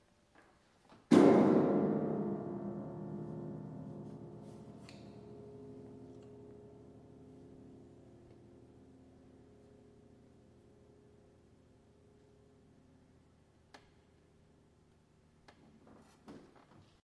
Piano key crash
A crashing sound from a piano, sounds percussive